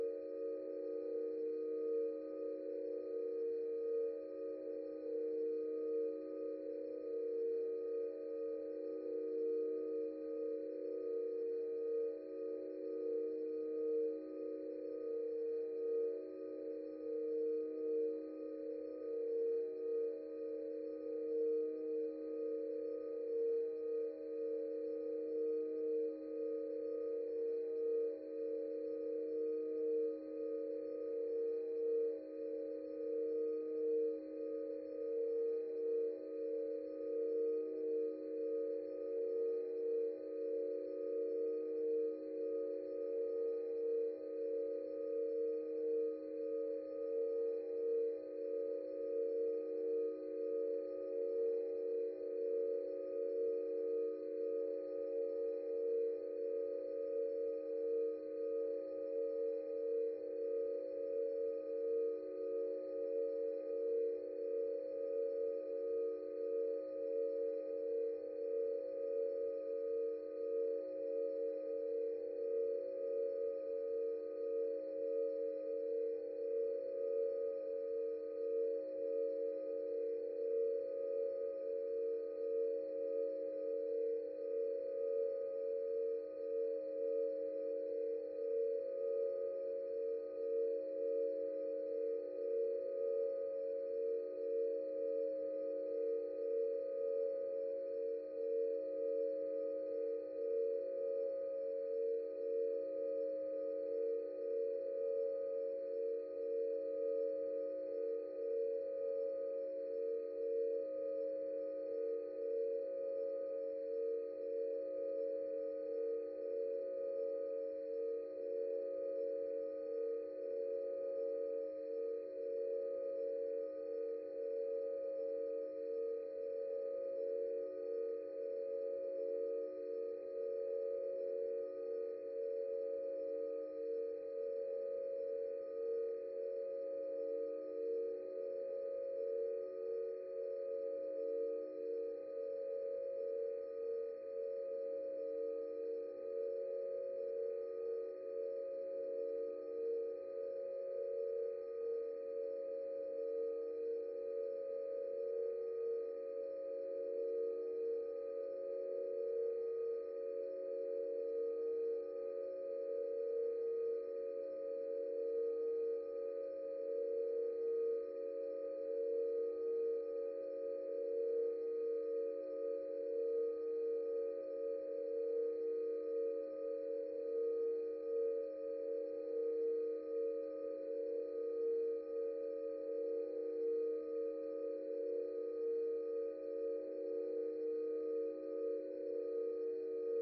box-slash-1
processed 'version' of the original 'box' soundfile, through Mammut filters.
musicbox, processed, drone, mammut